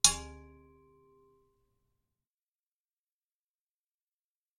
Relatively soft impact, but not as soft as sword_3